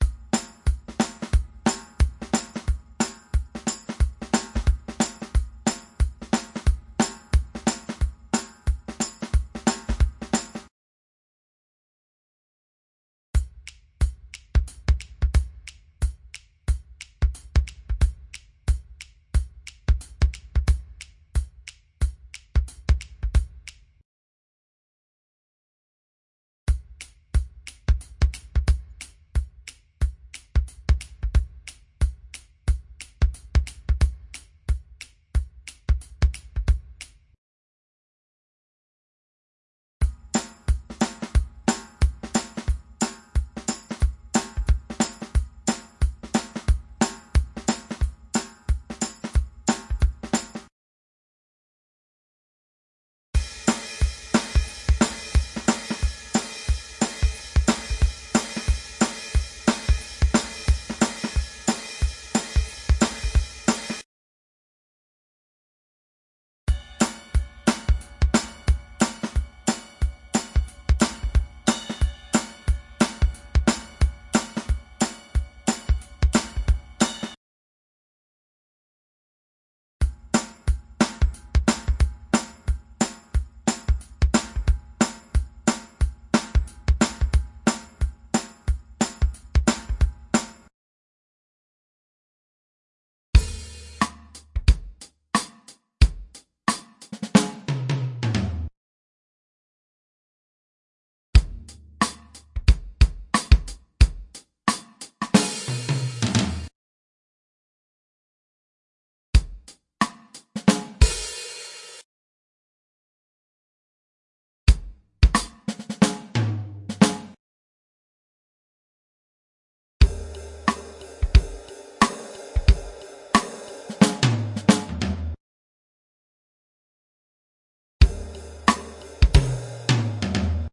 motown drums,90bpm
drum loops in a motown style.
percussive, drum-loop, percussion-loop, motown